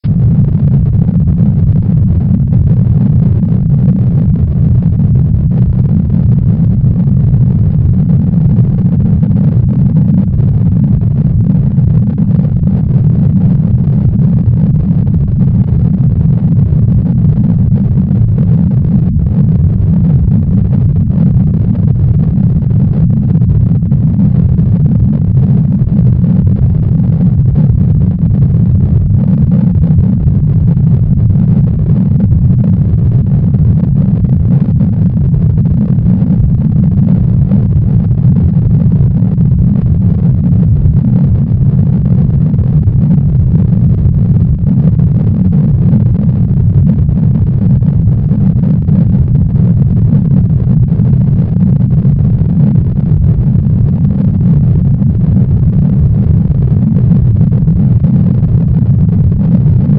Rocket Thrust 02
Rocket thrust!
If you enjoyed the sound, please STAR, COMMENT, SPREAD THE WORD!🗣 It really helps!